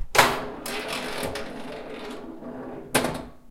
Instant Drain
A pinball is launched into the playfield and drains.
ball, shoot, Pinball